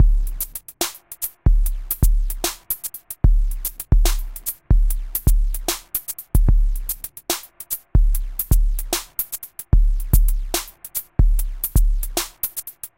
uncl-fonk
drum electro funk
funk, groove, electro, machinedrum